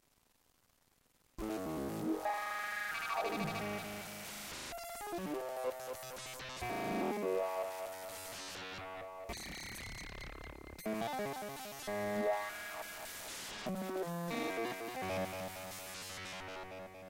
Guitar Glitch
This used to be a clean guitar sound, resampled through bitcrusher and kaoss pad
Glitch, Guitar, Bitcrusher, Kaoss